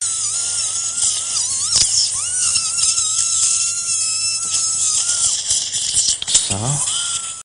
OP Bohrer 10
Geräusche aus einem Operationssaal: Drill noise with clinical operating room background, directly recorded during surgery
usche, clinical, noise, OP, Operationssaal, OR, Ger, surgery, Klinischer, Theater, Operating